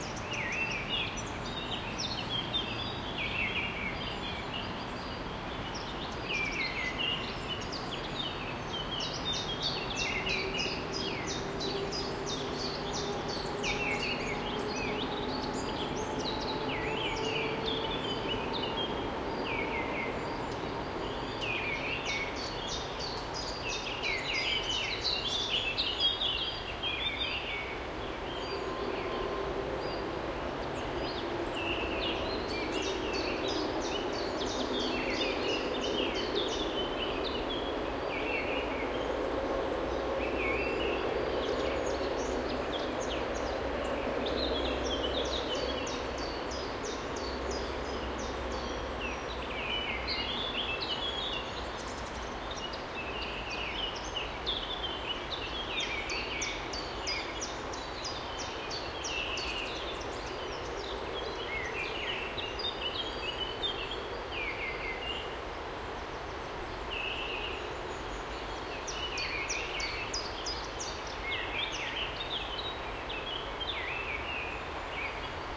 Morning forest near river and train 1
Sound of spring morning in forest. Birds are singing. A river flows nearby and a train runs in distance.
birds, river, train